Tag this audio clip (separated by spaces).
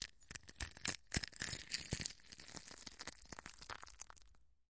shell foley crack egg stereo